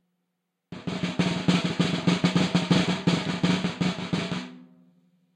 Snaresd, Snares, Mix (6)
Snare roll, completely unprocessed. Recorded with one dynamic mike over the snare, using 5A sticks.